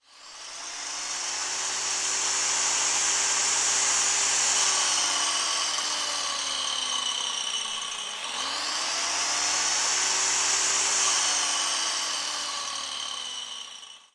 Sound of circular saw
CZ, Panska, Czech